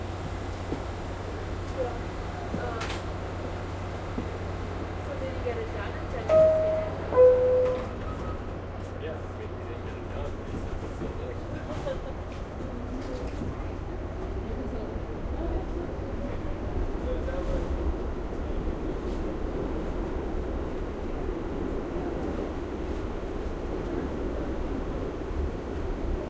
Subway Doors Closing Interior 02
Subway operator announcement, interior recording, chatter, female voice, door signal